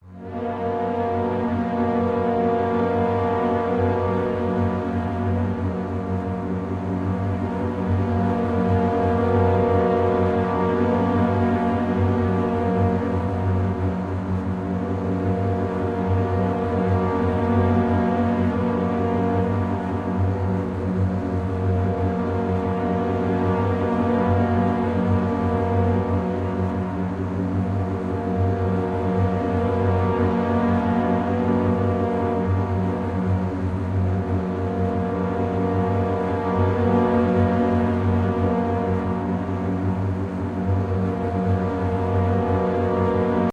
Angry Drone 4
An ambient track that sounds a bit angry. Can be cut down to fit whatever length needed, and is simple enough that a looping point could likely be found fairly easily if needed longer.